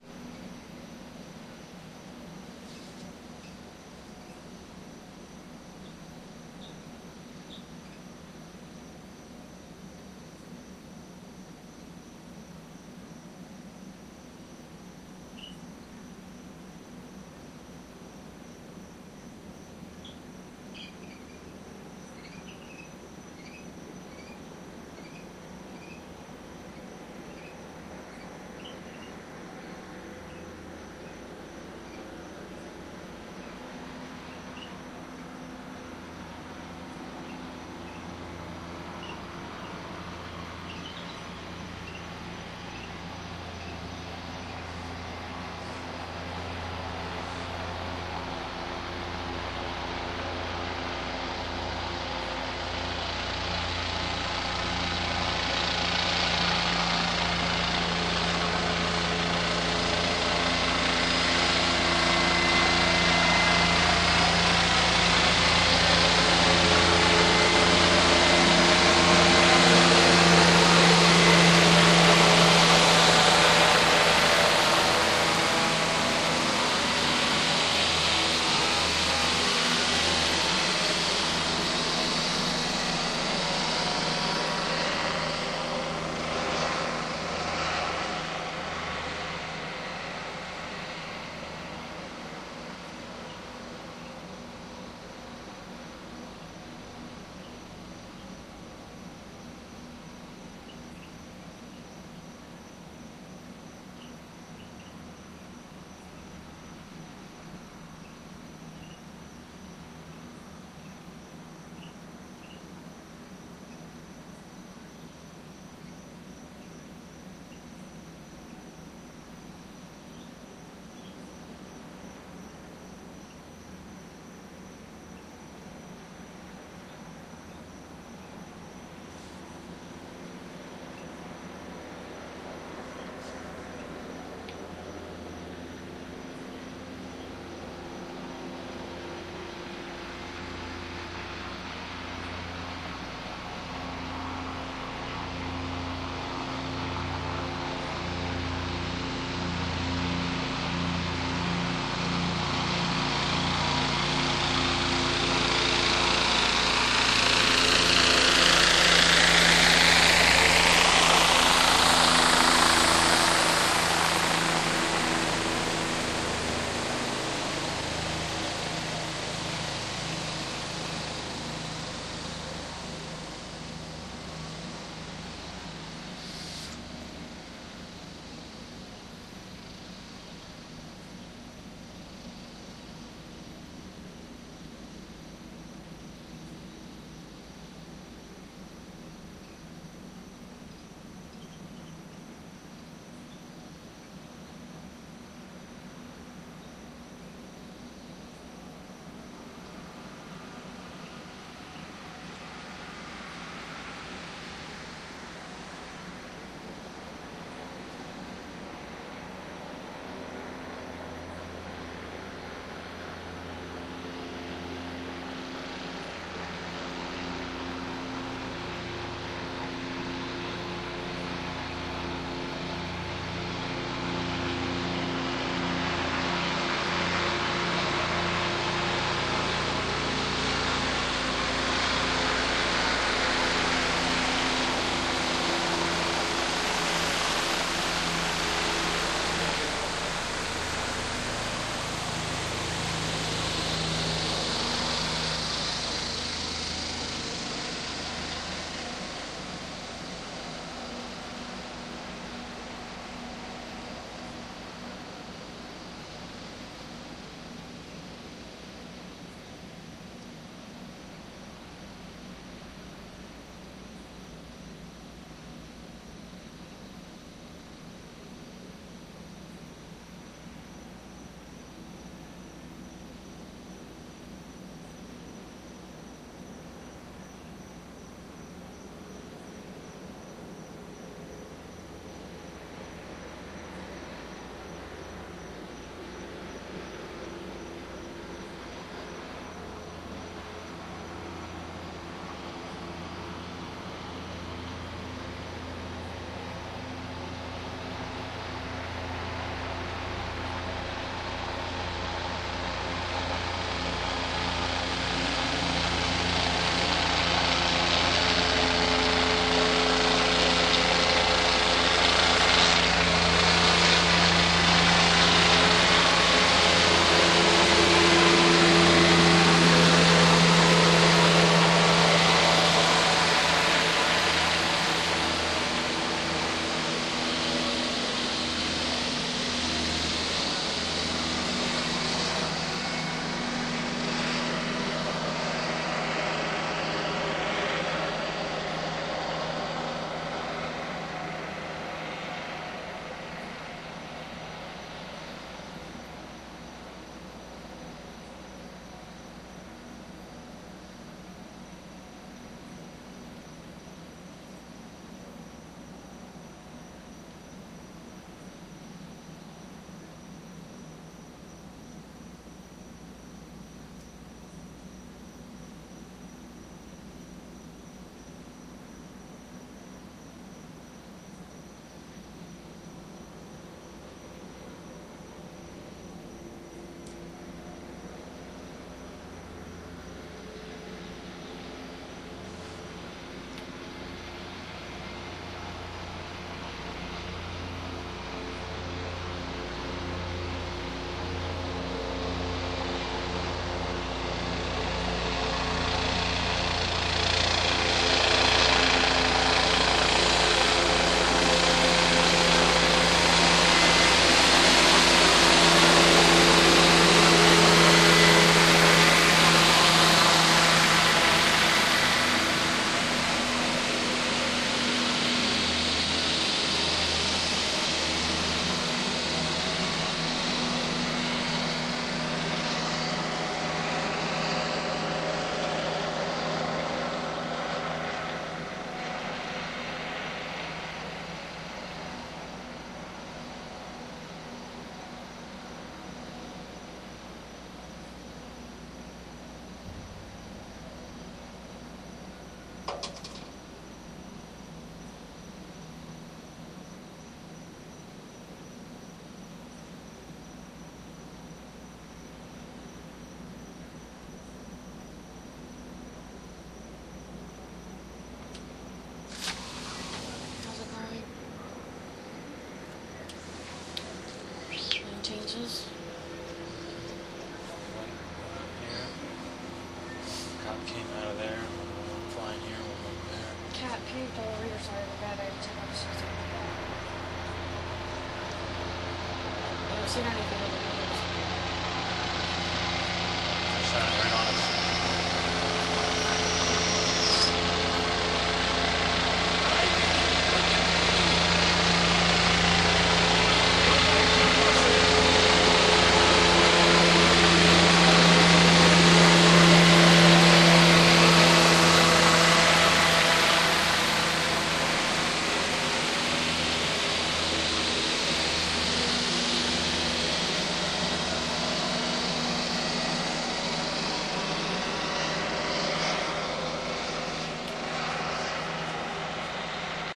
police chopper4 cat puke
Police helicopter and a dozen cop cars, including a K-9 unit searching the hood, recorded with DS-40 and edited in Wavosaur. Cops circling the block in cars as chopper passes overhead from the balcony.